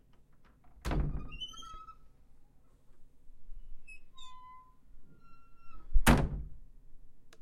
Closetdoor boom stereo verynear
wooden, closet, door